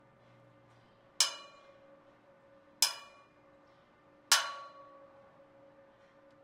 rock on metal post
Hit a rock against a metal post
sound, narrative